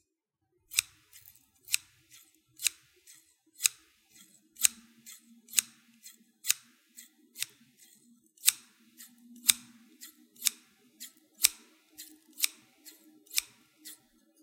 Scissors Snip Multiple Times
A dozen scissor snip sound effects in one clip
- Recorded with Yeti mic
- Edited with Adobe Audition
cutting, scissors, scissor-snips, scissors-snip